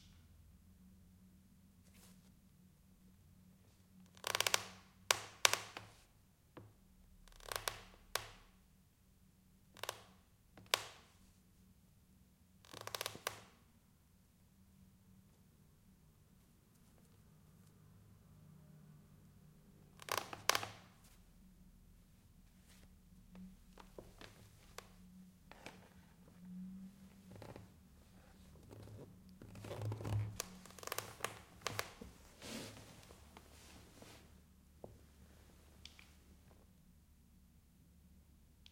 squeaking wooden floor 1
wood, squeak, wooden, floor, crack